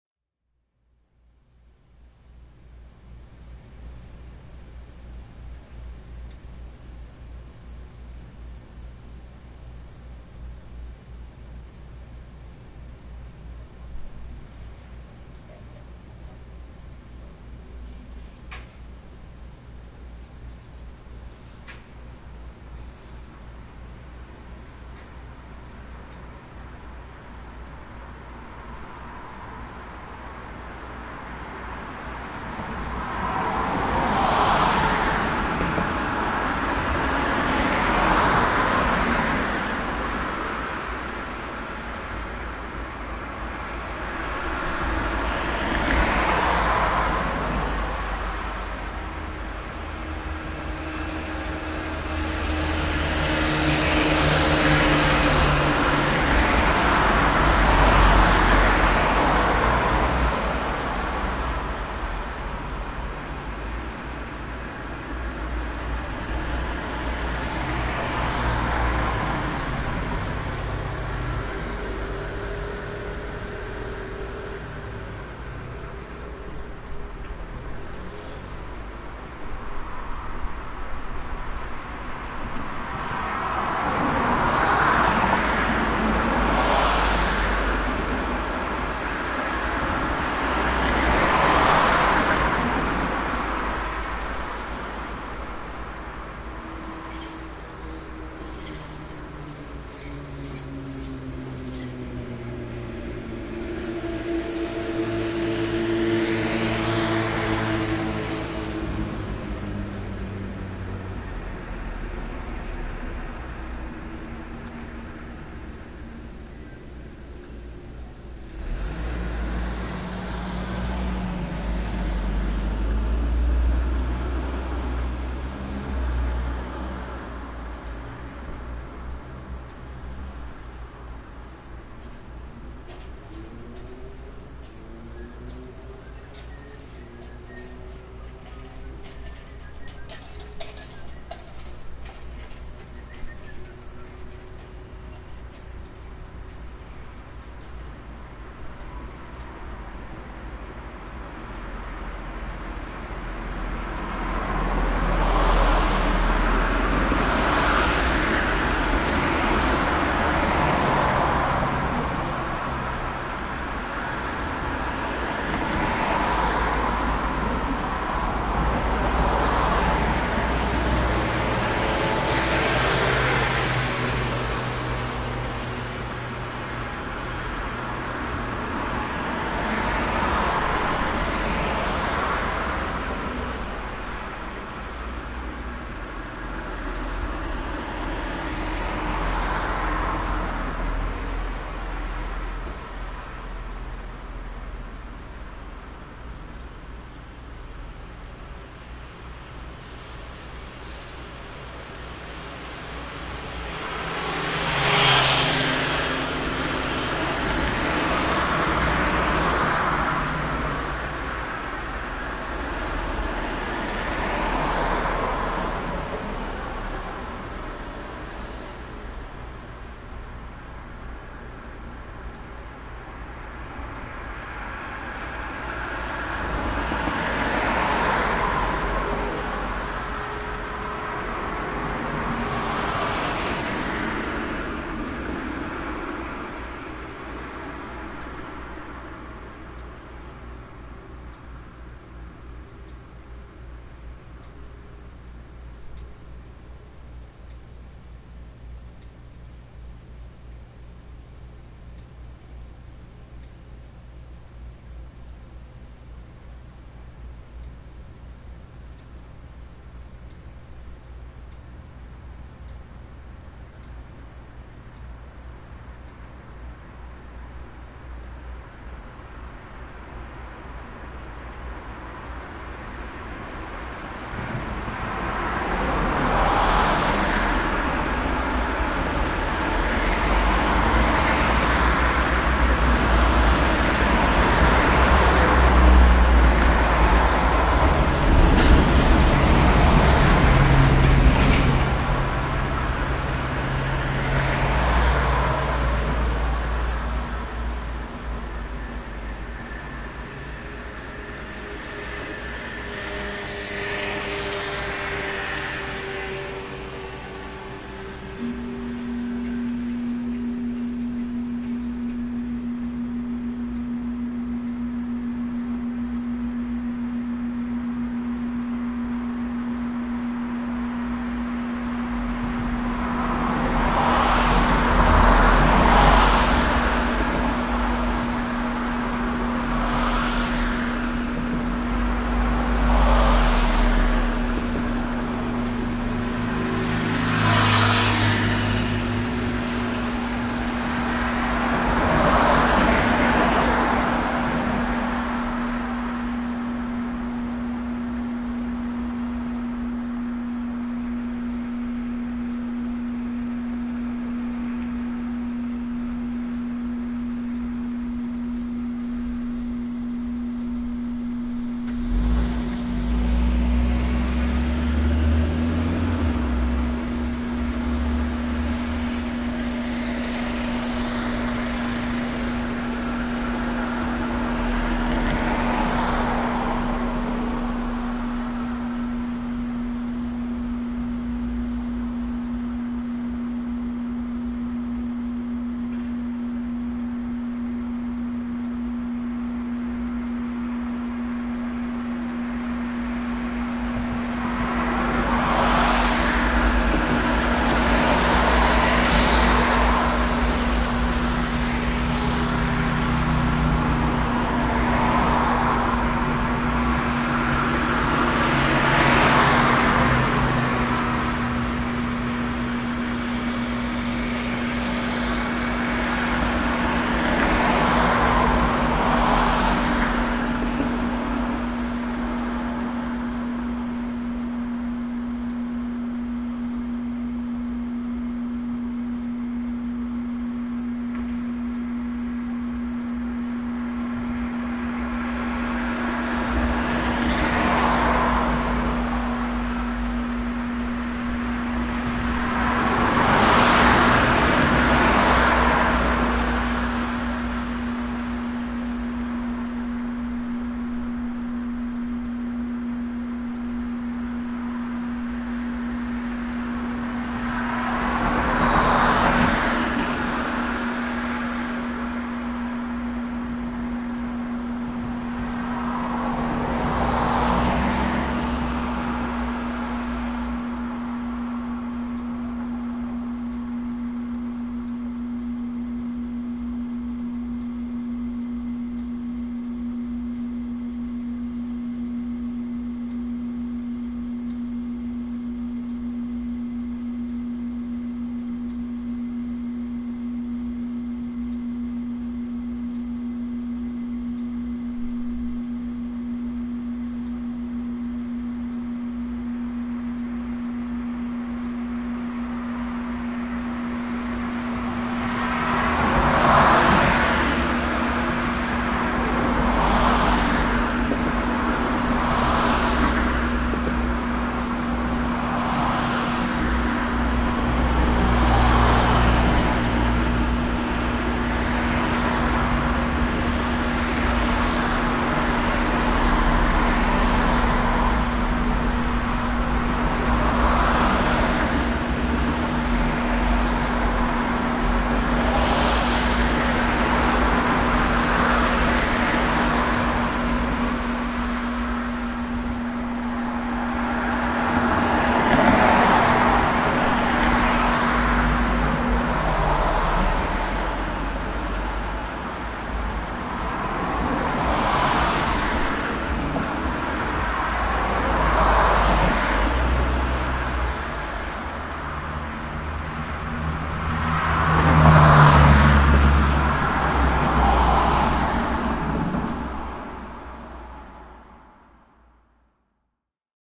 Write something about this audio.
SoundScape0720night
This sound is recorded at the road of Fukuoka in Japan. The sound of the car, motorcycle, bicycle and so on are included.
car, bicycle, field-recording, road, motorcycle